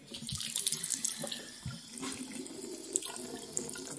Grifo Baño
Paisaje sonoro del Campus de la Universidad Europea de Madrid.
European University of Madrid campus soundscape.
Madrid, Europea, paisaje, Universidad, de, sonoro, UEM, soundscape